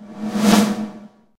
Snare processed with cool edit 96 reverb.